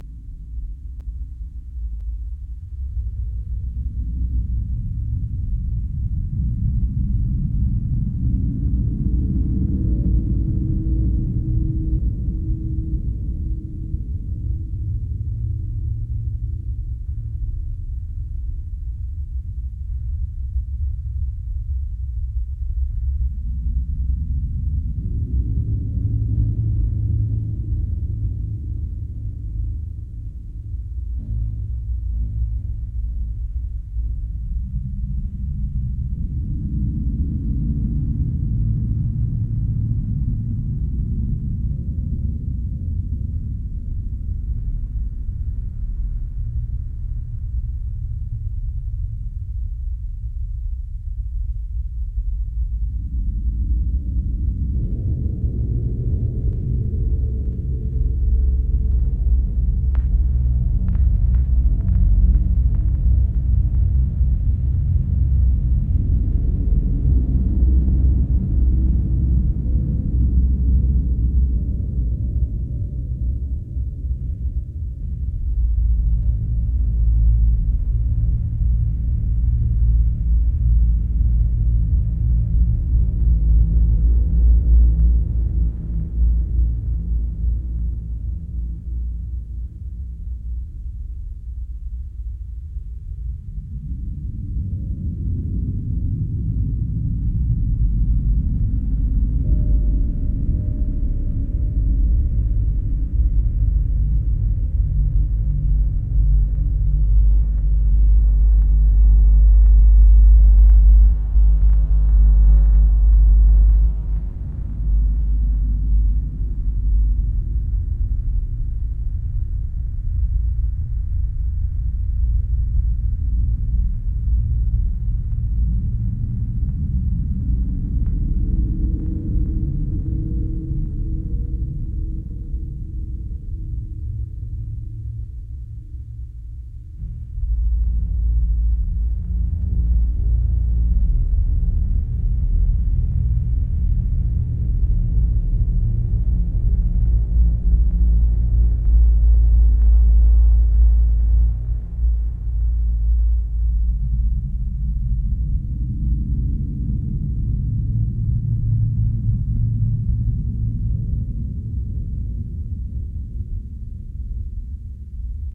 deep notes created with a osc, shaped with the "aetereal" and "alienspace weaver" vst's
effects: echo, reverb